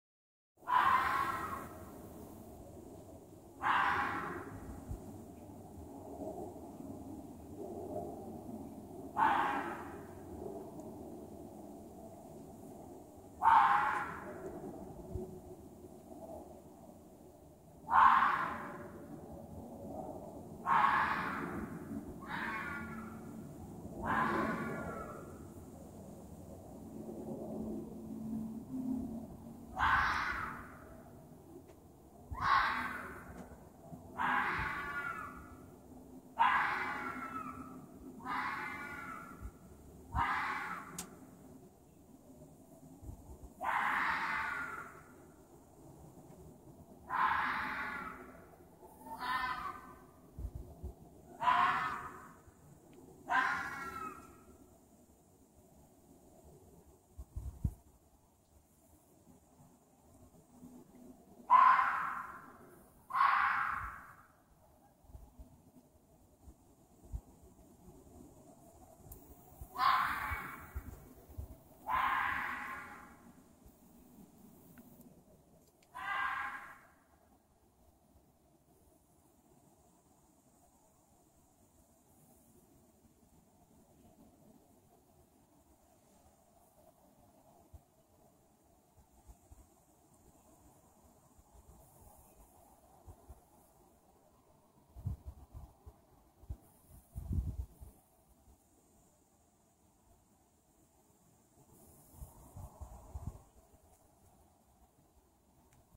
Fox screaming in the night
red fox screeching